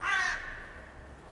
Most likely a crow (slight chance it was a raven) doing it's thing but in the distance. Slight cleanup of another bird chirping by deleting spectral frequencies. Fadein/out applied. (Edited in Audacity)
Zoom H2n, XY mode
background, field-recording, gamedev, h2n, horror, raven, scary, shout